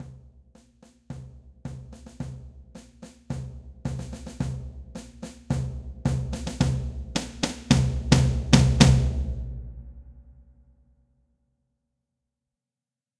Dark Drumbeat
A 9sec crescendo of marching drums (snares and toms)
Made by request for "dianakennedy"